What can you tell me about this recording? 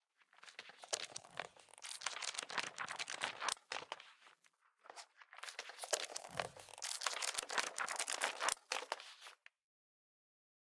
Pages Rolling
Quickly flipping through/rolling the pages of a textbook